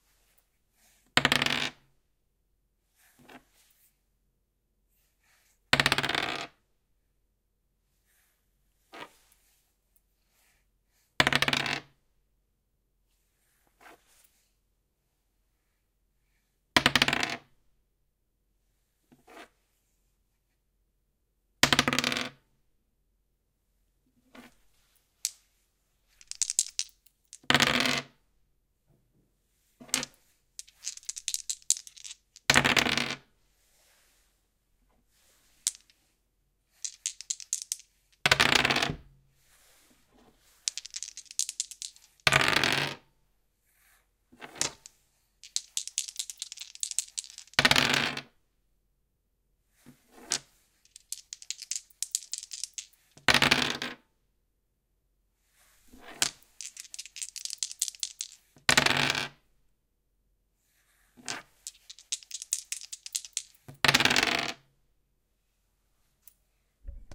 Rolling Single and Dual 20-Sided Dice
Rolling a single twenty-sided plastic die on a wooden desk, followed by two dice being shaken and rolled together on the same desk. Recorded with a Zoom h6.
20-sided, Dice, Polyhedral, d20, die, roll, rolling